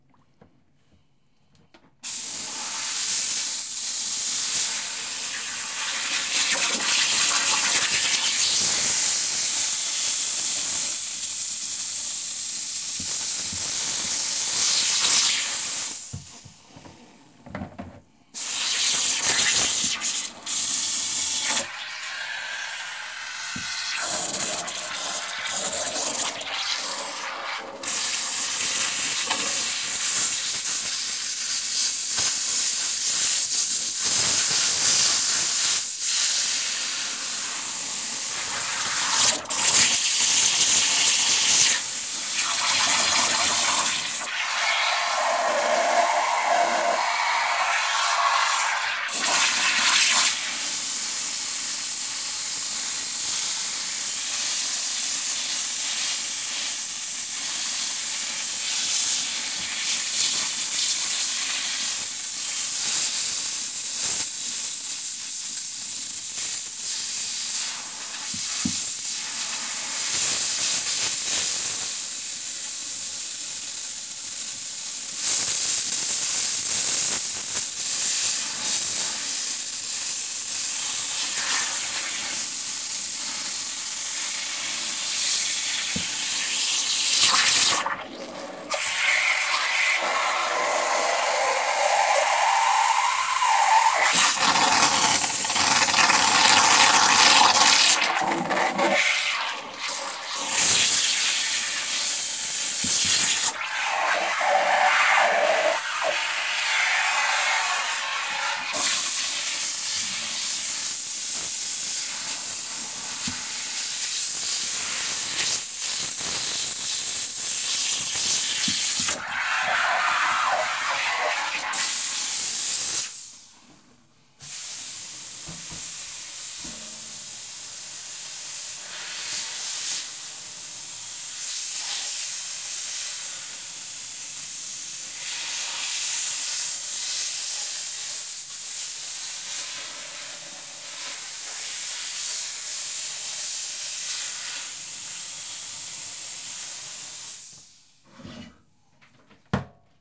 stream cleaner
This is a mad sound but interesting in it,s way it, the sound of a steam pressure cleaner, and sometimes the blowing was in water, giving a unusual sound. male a good alien or feed back of a transmission something braking or busting, a train or engine,and if slow down hundred of different ideas
water, blower, pressure, air, hiss, steam